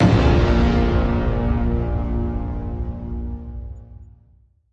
⇢ GREAT Synth 3 A#
Synth A#. Processed in Lmms by applying effects.
synth-dubstep, dubstyle-synth, lead-dubstyle, lead-dubstep, synth-dubstyle, dubstep-synth, synth-hardstyle, hardstyle-lead, hardstyle-synth, synth-a, lead-hardstyle, lead-a, dubstep-lead, dubstyle-lead